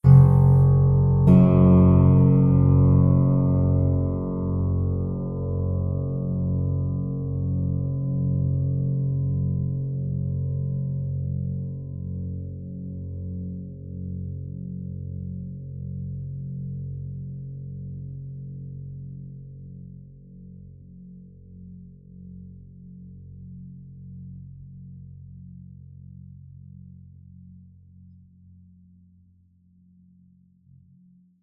Plucked two string of an upright piano.